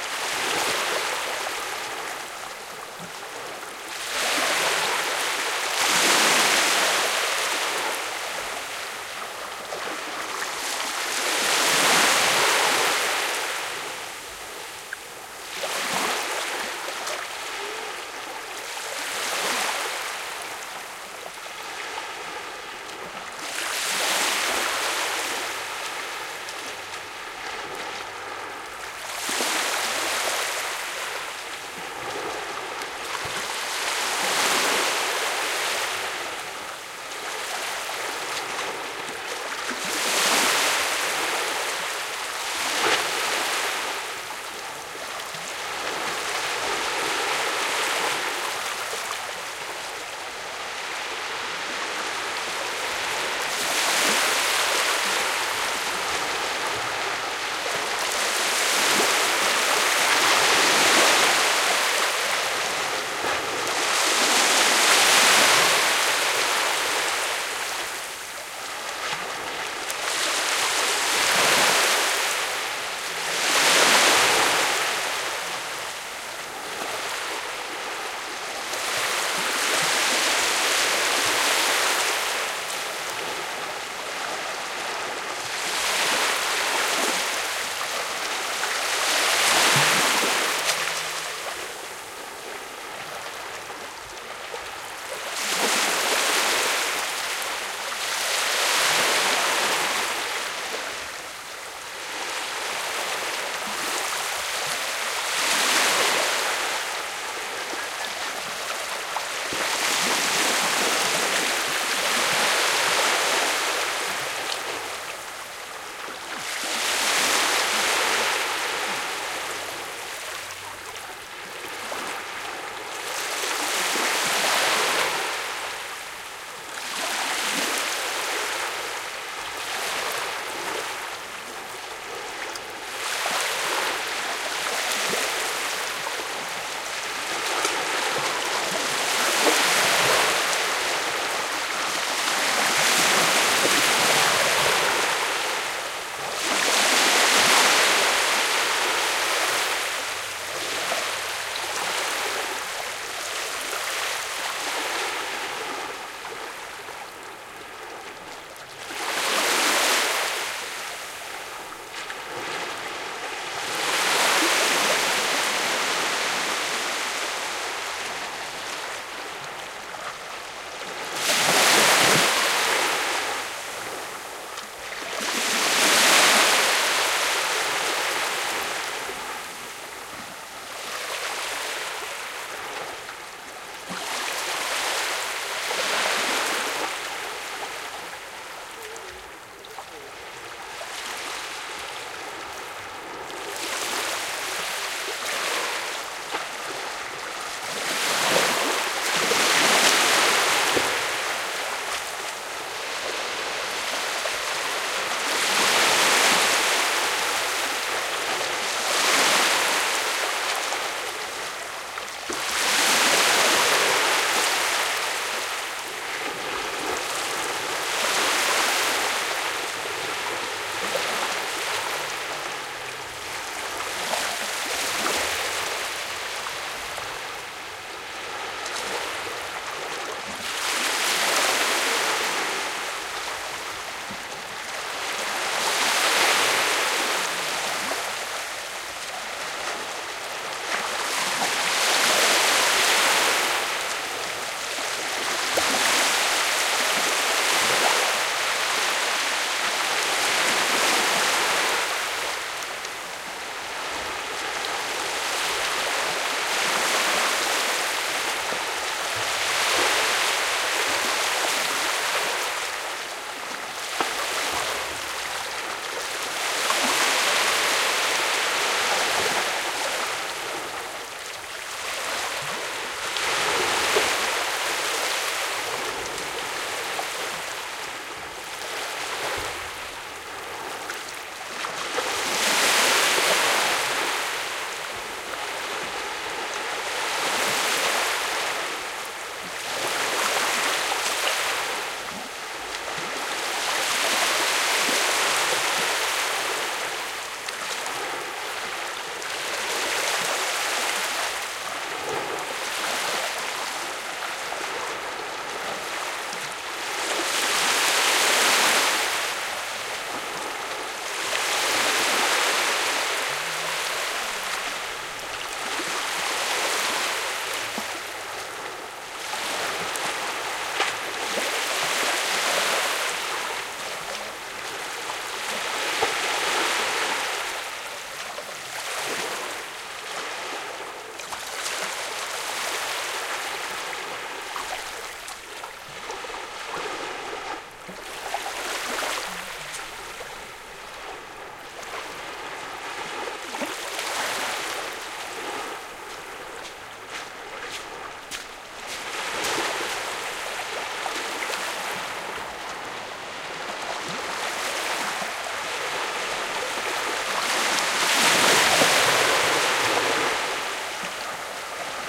soft waves splashing on a sandy beach inside an inlet. Shure WL183 mics, Fel preamp, Olympus LS10 recorder. Recorded at Ensenada Grande, Isla Partida (Baja California S, Mexico)